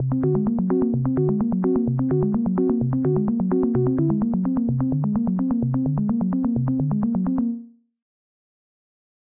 Tisserand-DopyLoop
A funny (or mad) loop!
ambience, movie